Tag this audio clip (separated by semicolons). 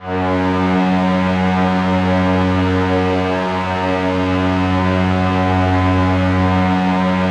Strings; Multisample; Synth; Bowed